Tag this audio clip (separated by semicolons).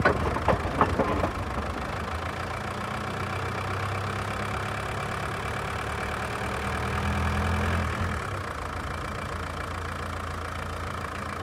car; cars; city; clunk; diesel; drive; driving; effect; engine; golf; malfunction; mechanical; noise; problem; road; sound; street; traffic; vehicle; volkswagen